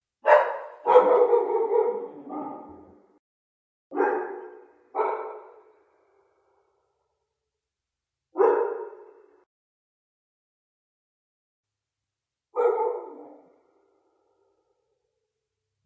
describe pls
Barking Dogs II
barking, dogs